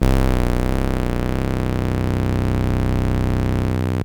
A single note played on a Minibrute synthesizer.
Minibrute, Samples, Synthesizer